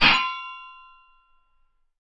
Chinese blade2
blade, Chinese, slash, China, sword